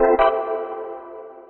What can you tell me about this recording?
click sfx7
This is a pack of effects for user-interaction such as selection or clicks. It has a sci-fi/electronic theme.